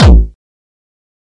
Distorted kick created with F.L. Studio. Blood Overdrive, Parametric EQ, Stereo enhancer, and EQUO effects were used.
hardcore, trance, techno, hard, melody, bass, drumloop, synth, kick, distortion, kickdrum, beat, distorted, progression, drum